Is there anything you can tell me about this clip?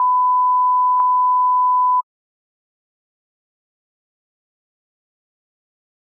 Surround Test - 1kHz tone
A 1kHz test tone played at -6dBFS over each channel in the following order: L R C sub Ls Rs.
See this pack for full surround test sound.
calibrate, multi-channel, tone, calibration, track, surround, test, multichannel